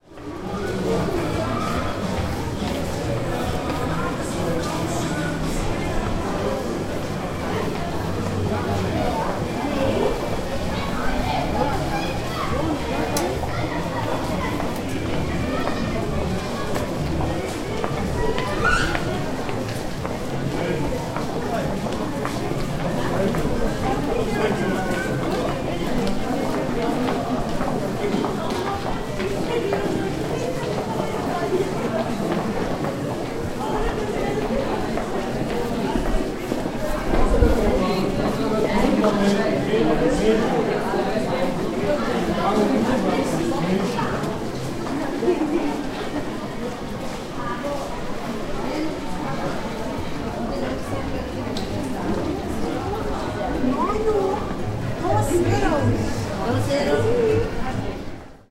zuidplein-shoppingcentre(mono)
A short recording walking through the shopping centre at Rotterdam Zuidplein.